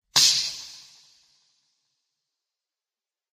A Refreshment of 3 Liters being uncovered.
Recorded with HUAWEI Y5 LITE